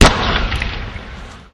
Specific details can be red in the metadata of the file.